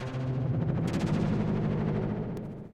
Vibra Launch
lfo noise eventide h3000 dse
eventide, h3000, noise, lfo